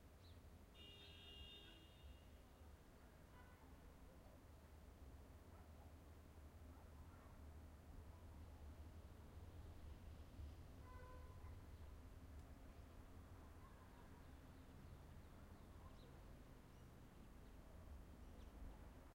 Car horns in a village
Distanc car horns.
Recorded in a village near Sintra, Portugal. 19 August 2016, around 19:00 with a Zoom H1 with wind shield.